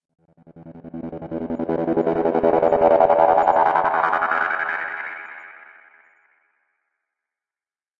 A low range synth tone, gated and echoed in stereo. Tempo is 160 BPM and the gate is opening on 16ths. Key is E.